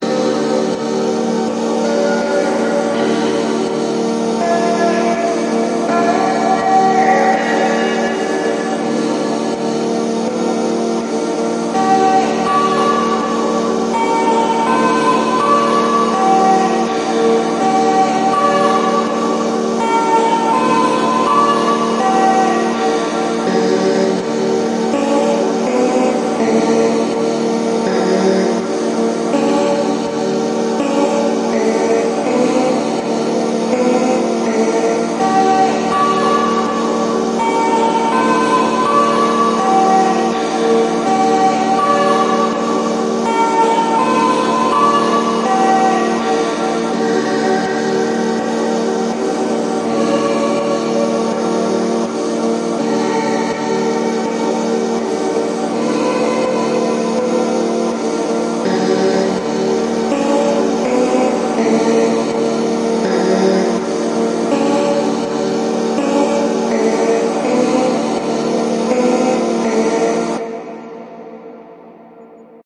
Longer remix of my ethereal loop.